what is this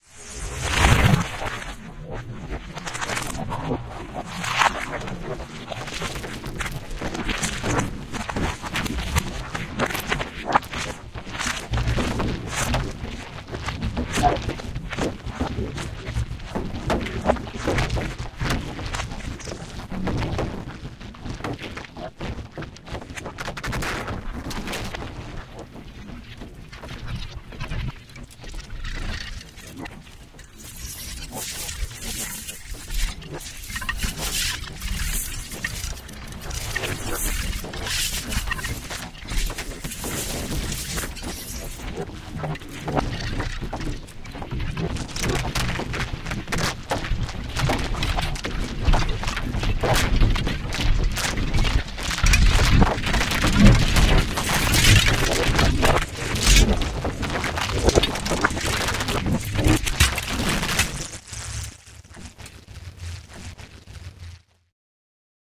second version for "Wind", part of the second sample pack for the competition. the sound sources used here as well are edited sections from several field recording sessions, which took place in an underground construction area in the netherlands. the original recording was chopped into short 1sec-10sec fragments, re-arranged in time and processed with various filters and custom effects, including distortions, granular processing and frequency shifting. i tuned the envelopes of each of the sound fragments and put them together in such way that will hopefully give them the characteristics of the wind and air stream. i also used amplitude and phase inversion techniques for that purpose. recorded using C1000 condenser mic and Sony MZ-N505 minidisc. additional editing, EQ tunings and stereo panning were done in Peak. effects processing in Pd.